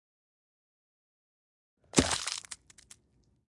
Crushing fruits and veggies mixed together, EQ, and bam. Use this in your next horror/zombie flick!